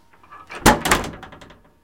A closet door, opening or closing. Recorded with Edirol R-1 & Sennheiser ME66.
close, closed, opened, opening, closet, closing, opens, closes